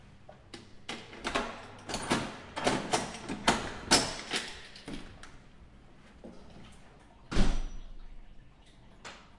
Opening the door with a key - a large reverberation and closing doors
a, door, key, large, reverberation